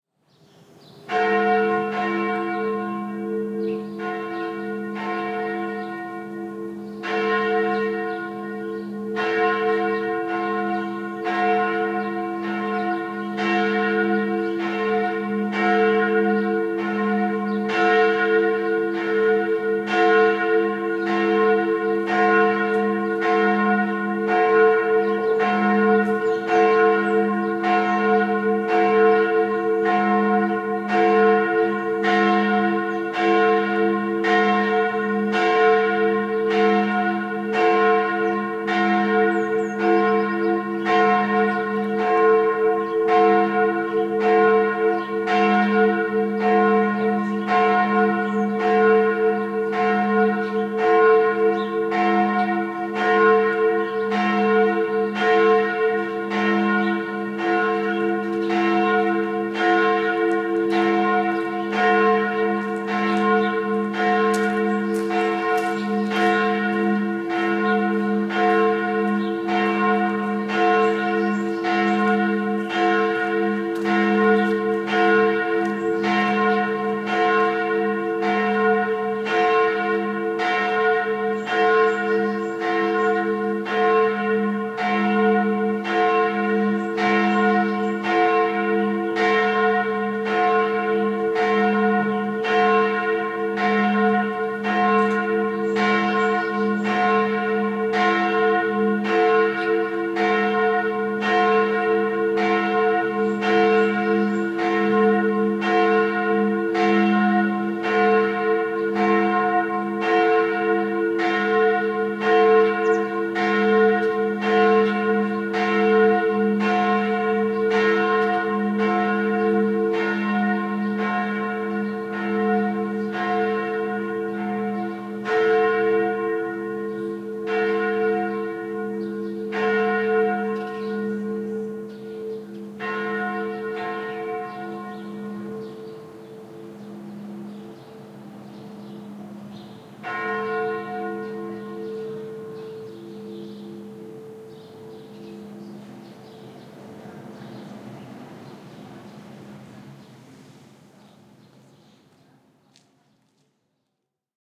I recorded this sound at 12 o'clock on the dot in front of a church in a smaller part of town.